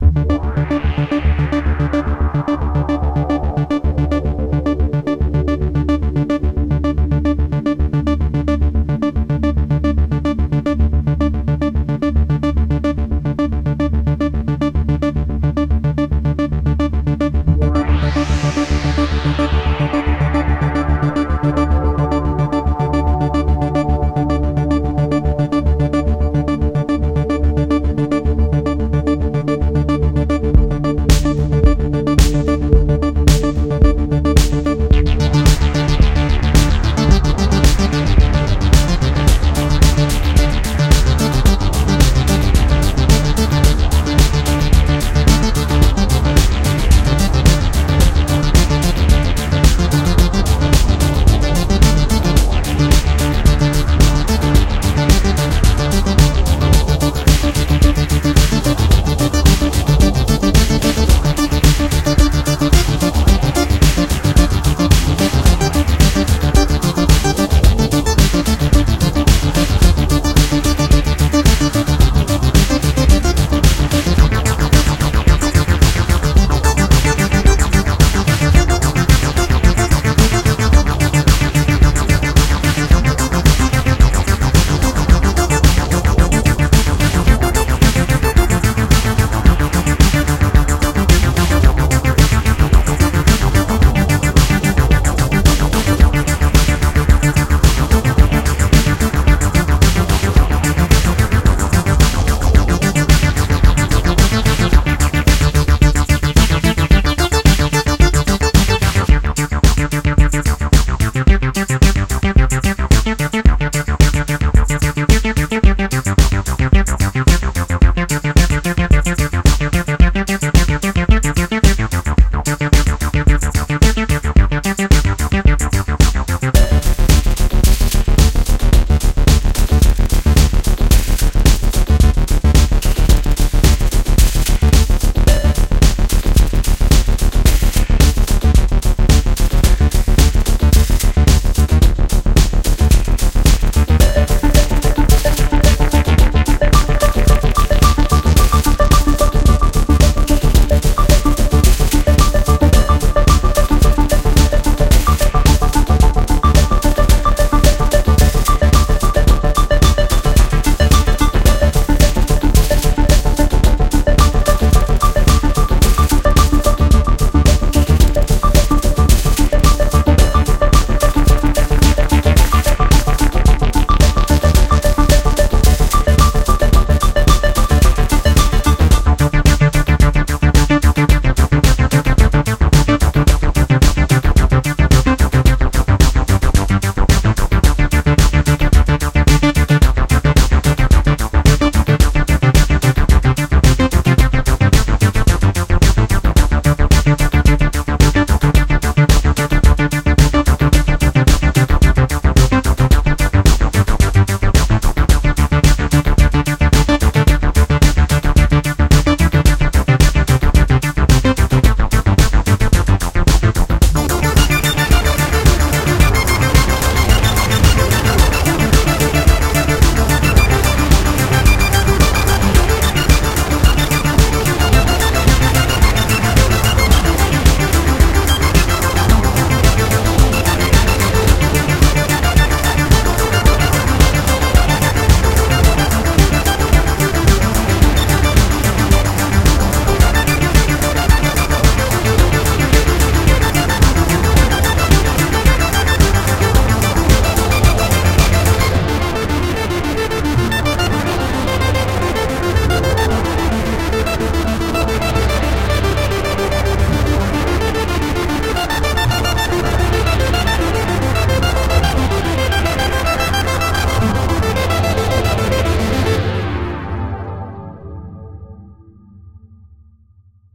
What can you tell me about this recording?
Minibrute + PO-20 Arcade + Misc Drum Samples
110 BPM
Key of Dm